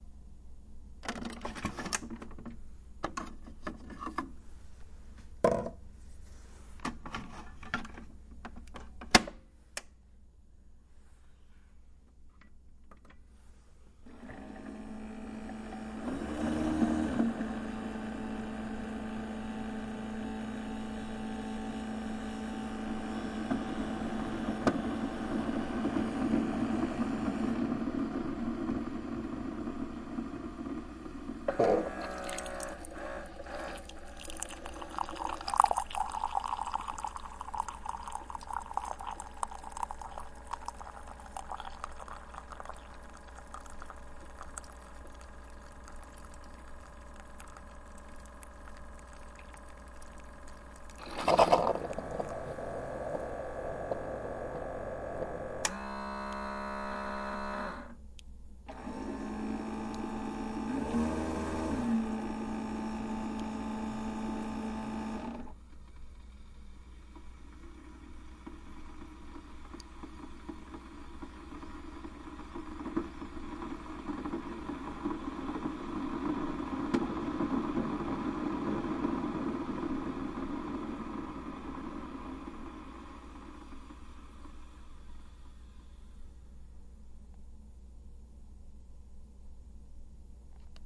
A close-up recording of a Keurig delivering some coffee. Goes through warm up, pumping, serving, and finally gurgling steam at the end.